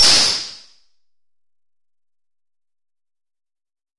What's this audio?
Tonic Electronic Cymbal
This is an electronic cymbal sample. It was created using the electronic VST instrument Micro Tonic from Sonic Charge. Ideal for constructing electronic drumloops...